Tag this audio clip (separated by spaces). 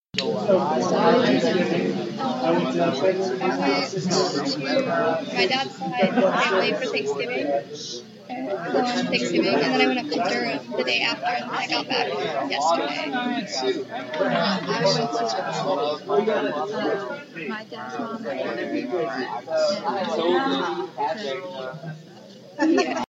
Group Talking People